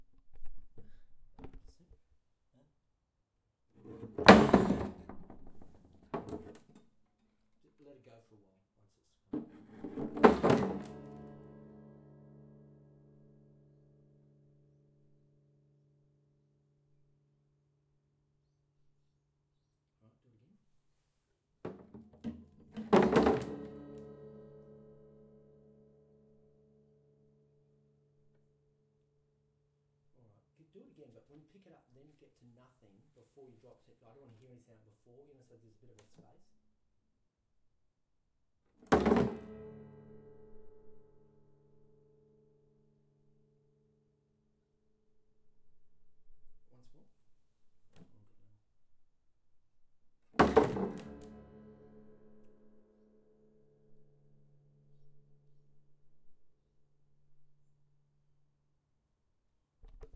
This is the sound of dropping a acoustic guitar on concrete from a small distance a number of times.